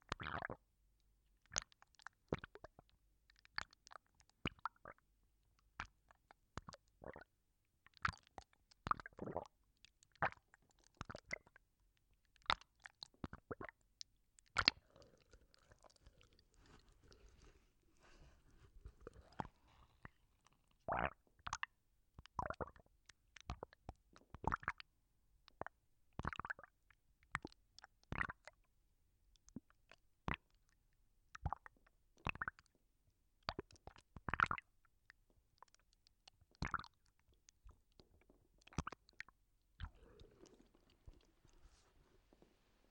Gurgling (non human)
Gurgling sound created with a hot-water bag. Quiet studio recording.
non-human, gurgle, gurgling